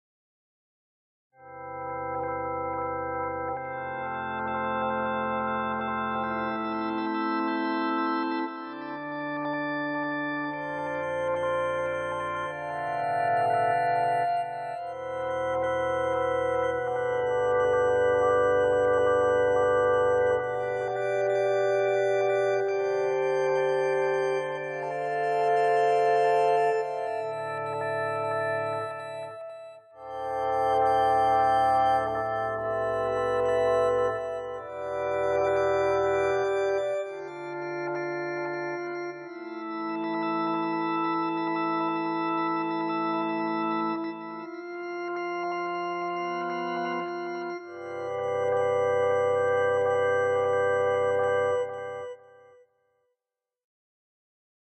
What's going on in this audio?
A gentle pad sound. A few seconds of recording this pad. It's a gentle pad that is suitable for a wide variety of uses.
calm, gentle-pad, pad